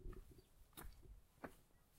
Footsteps Dirt 01
Walking on dirt